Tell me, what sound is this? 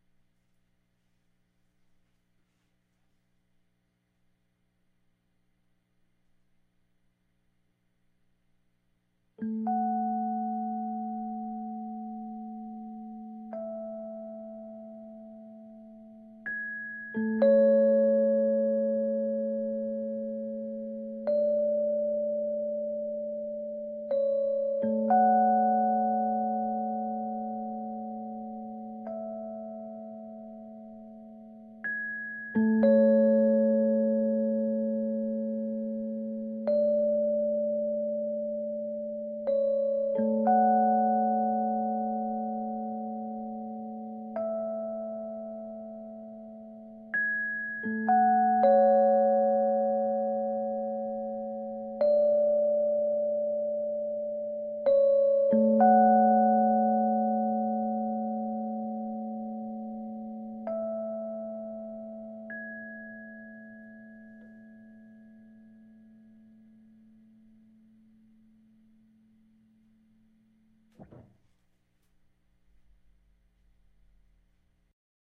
Rhodes melody
A recording of a Fender Rhodes through a Fender reverb amp using a SE tube mic.
Check out my tunes at:
Keys, Piano, Rhodes, electric-piano